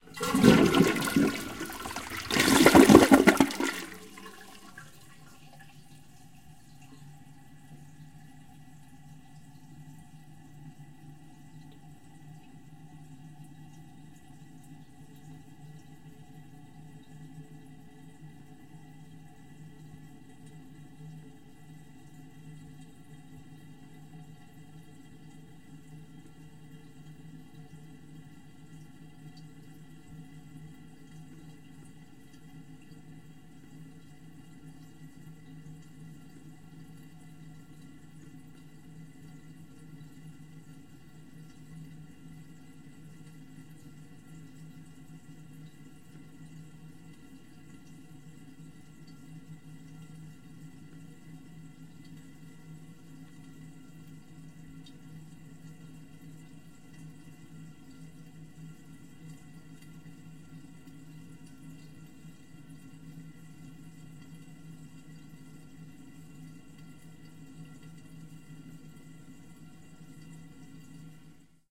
This is a normal flush from a Mariott hotel in Mooresville, North Carolina, United States. I used an Audio-Technica AT-822 single-point stereo mic and a Zoom h4 to capture it.